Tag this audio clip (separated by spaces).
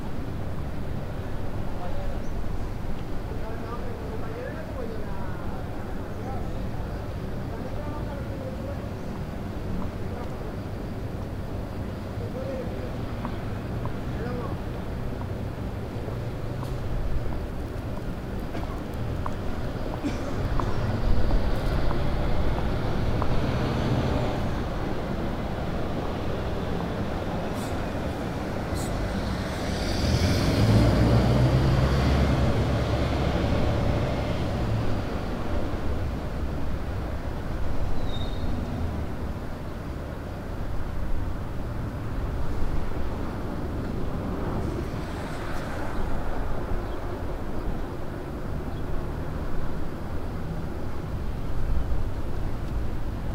ambient heavy highheels hiheel jabbering outdoors traffic